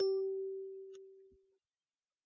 clean sol 3
eliasheunincks musicbox-samplepack, i just cleaned it. sounds less organic now.